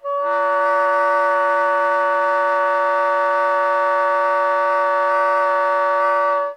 I found the fingering on the book:
Preliminary
exercises & etudes in contemporary techniques for saxophone :
introductory material for study of multiphonics, quarter tones, &
timbre variation / by Ronald L. Caravan. - : Dorn productions, c1980.
Setup: